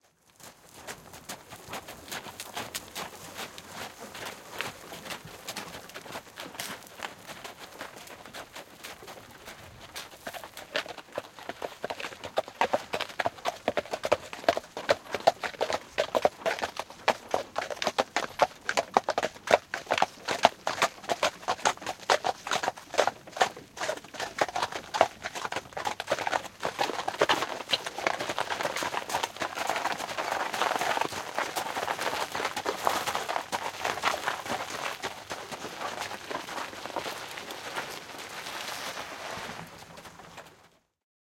Horsewagon horse walking slowly ext
Horsewagon from 18th century